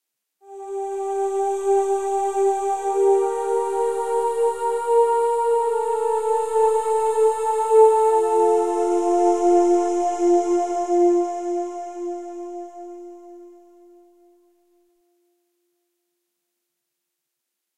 made with vst instruments
space, deep, horror, music, dramatic, movie, suspense, mood, film, pad, drone, spooky, drama, thrill, hollywood, ambient, trailer, dark, atmosphere, thiller, sci-fi, ambience, background-sound, soundscape, scary, background, cinematic